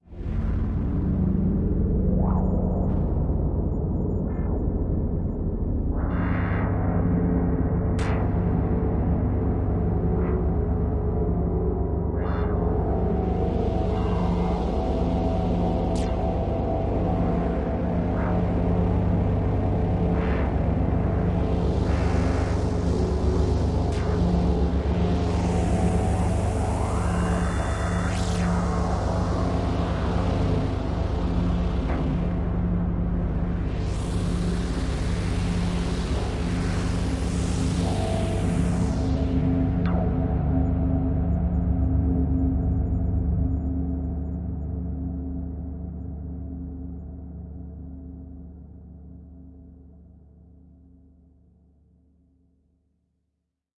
Very spooky cavernous sound